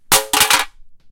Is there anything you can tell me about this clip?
A metal can dropped on a cement floor